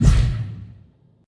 electric woosh
Electric futuristic sword swing
attack, cut, electric, laser, swash, swing, swoosh, sword, weapon, woosh